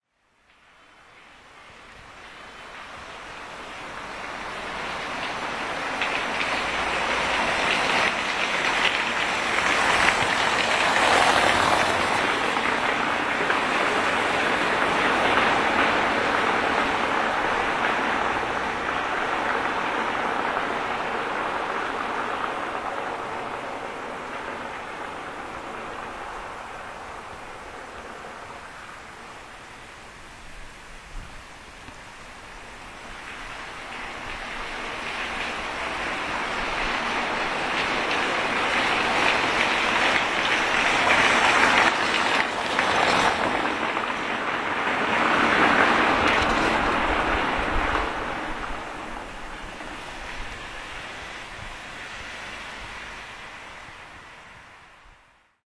za bramka auta noc 280609
taxicabs passing by; the street (Za Bramka) has a cobbled surface. night 28.06.09/Poznan/Poland